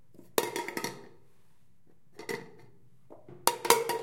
can-falling01
A can falling.
falling, bounce, bouncing, fall, can